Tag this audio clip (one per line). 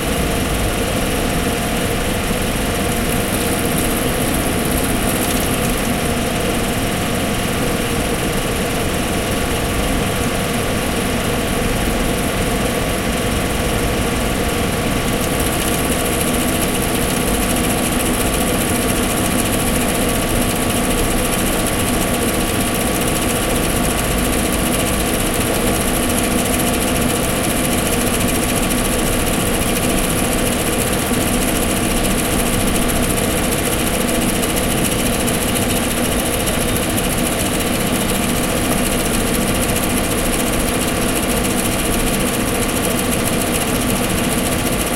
computer
cooler
factory
industrial
machine
machinery
mechanical
noise